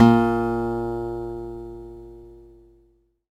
Sampling of my electro acoustic guitar Sherwood SH887 three octaves and five velocity levels
guitar, multisample, acoustic